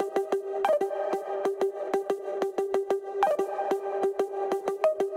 Plucky Vocal Synth Melody 2 [93bpm] [G Minor]
chop, cinematic, free, loop, melodic, melody, midtempo, pluck, synth, synthy, vocal